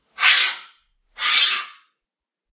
Metal Slide 9
Metal on Metal sliding movement
Metallic, Scratch, Slide, Movement, Scrape, Metal